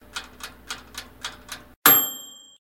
Oven timer complete
This is the sound of the oven timer being completed.
TimeOver, Complete, Timer, Oven